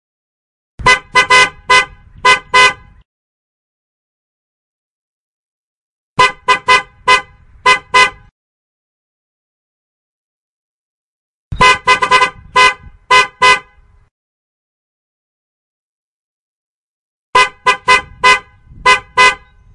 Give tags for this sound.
Car; horn; tune

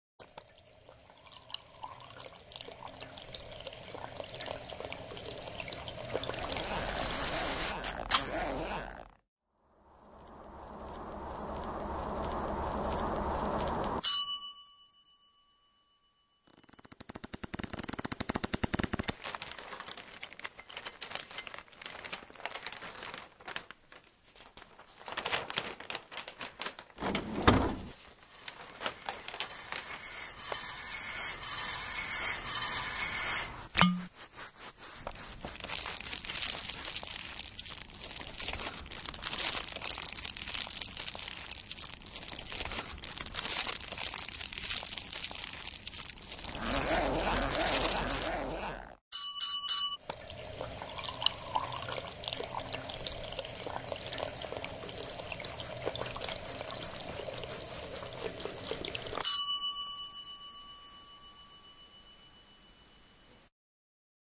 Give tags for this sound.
wispelberg; ghent; belgium; sonicpostcards; cityrings

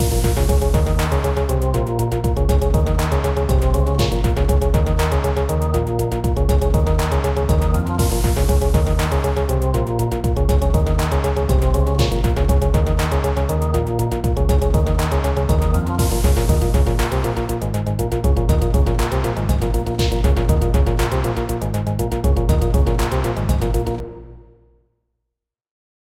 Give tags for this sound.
ambience; atmosphere; game; lonely; loop; music; rhythmic; sad

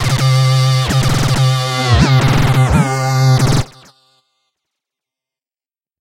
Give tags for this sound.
gun; lead; multisample

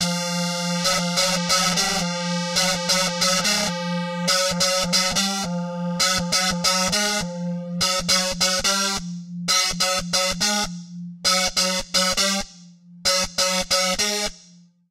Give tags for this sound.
bass; bitcrush; distorted; free; grit; guitars; live

